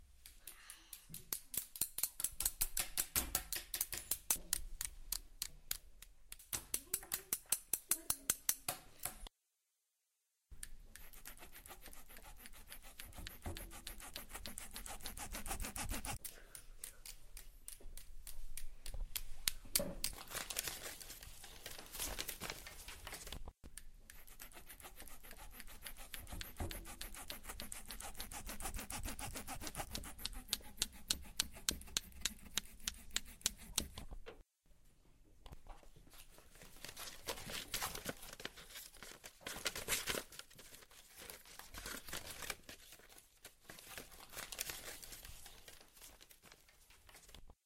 Here soundscapes created by students of La Poterie school.